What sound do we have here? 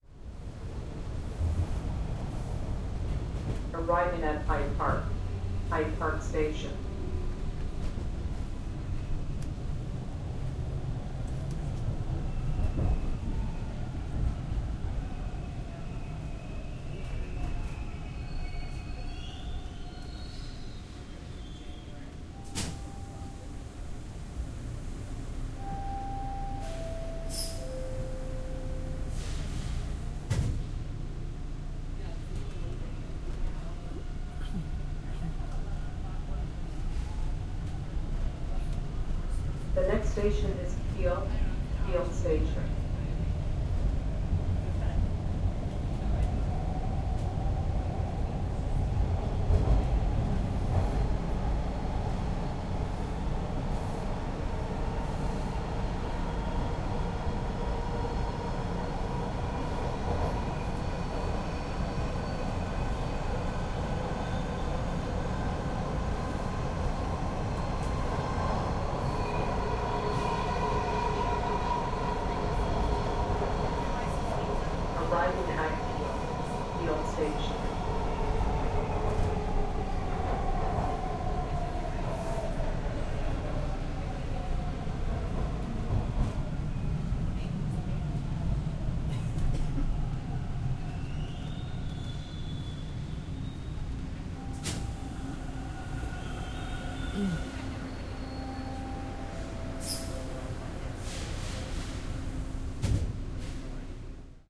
Subway train ride. Car interior.
subway, train